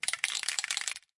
Spray Can Rattle 2
Spray Paint Can Rattle